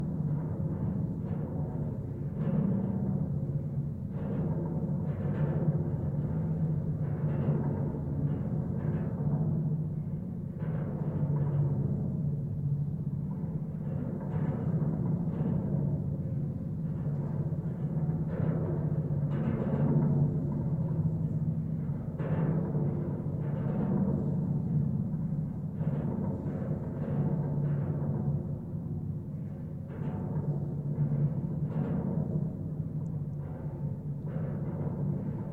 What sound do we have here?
GGB 0311 suspender NE21NE
Contact mic recording of the Golden Gate Bridge in San Francisco, CA, USA at NE suspender cluster 21, NE cable. Recorded February 26, 2011 using a Sony PCM-D50 recorder with Schertler DYN-E-SET wired mic attached to the cable with putty. Near the north tower, sound is dampened and has less cable, more vehicular noise.